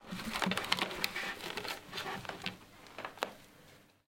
15 cat jump and holding
cat, hold, jump